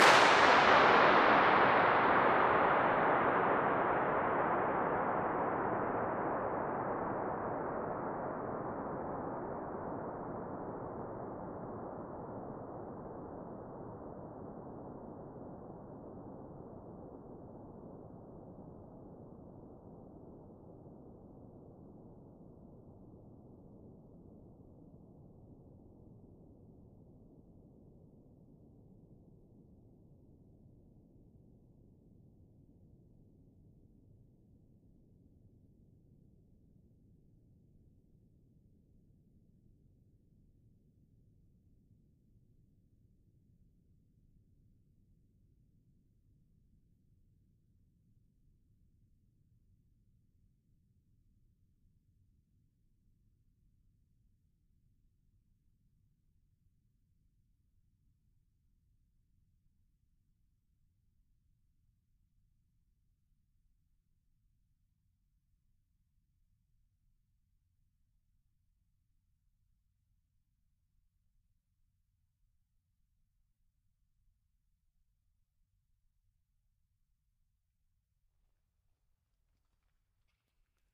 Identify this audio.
World's 'longest-echo' 5th impulse
Measured for Sonic Wonderland/The Sound Book, this is an uncompressed impulse response from the space which holds the Guinness World Record for the 'longest echo'. It is a WWII oil storage tank in Scotland. Impulse response measured using 1/4" measurement microphone and a starting pistol.
Allan-Kilpatrick, echo, guinness-world-record, inchindown, longest-echo, oil-storage, oil-tank, rcahms, reverb, reverberation, reverberation-time, Salford-University, scotland, sonic-wonderland, the-sound-book, trevor-cox, tunnel, uncompressed